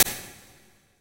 cooledit mediumauditorium
2nd set of impulse responses created in Cool Edit 96 with the "echo", "delay", "echo chamber", and "reverb" effect presets. I created a quick burst of white noise and then applied the effects. I normalized them under 0db so you may want to normalize hotter if you want.
convolution, free, edit, cool, 96, presets, ir, impulse, reverb, vintage